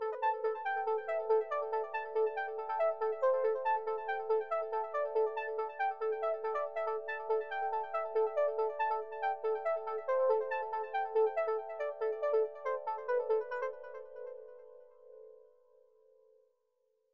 trance melodic loop i wrote using gladiator synth.riff never made the track though